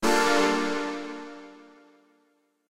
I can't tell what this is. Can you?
music game, dun 3
Music created in Garage Band for games. A dun-like sound, useful for star ranks (1, 2, 3, 4, 5!)